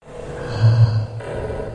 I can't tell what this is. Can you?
monster roar in distance
A monster roar in the distance. Was made using Laptop Microphone, and recorded and modified using Audacity.
Recorded 16/12/2014